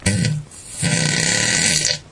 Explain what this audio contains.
fart poot gas flatulence
flatulence, gas